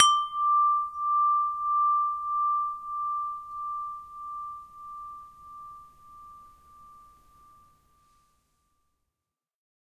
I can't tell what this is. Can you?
windchime tube sound
sound, tube, windchime